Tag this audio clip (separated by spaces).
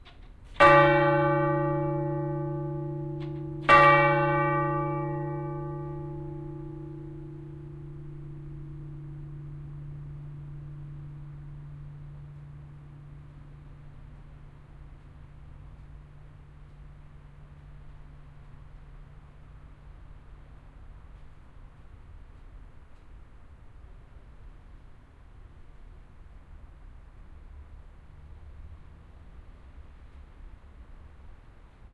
albert-clock belfast bell chime clock-tower ring